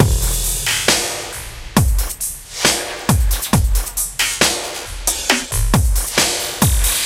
Cool Drum Loop